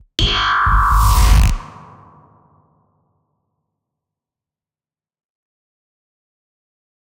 Lazer Pluck 10
Lazer sound synthesized using a short transient sample and filtered delay feedback, distortion, and a touch of reverb.
Laser, zap, synth, beam, spaceship, sci-fi, synthesizer, monster, buzz, alien, Lazer